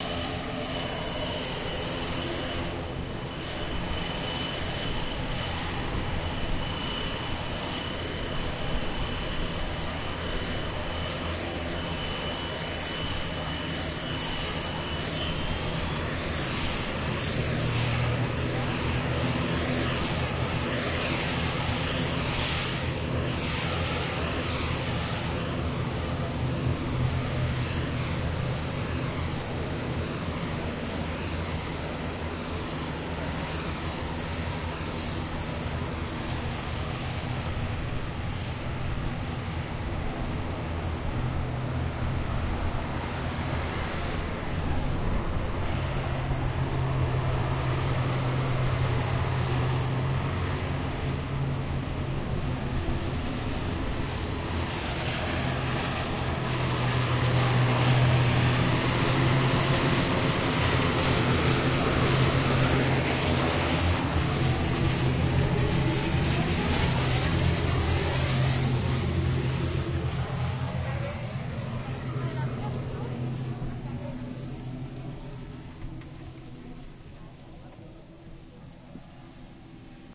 Ambience of the takeoff pad in an airport. Recorded with the Marantz PMD 661 MKII internal stereo mics.
aeropuerto, airport, ambiance, ambience, ambient, ambiente, avi, avion, despegue, field-recording, n, pista-de-despegue, soundscape, spain, takeoff-pad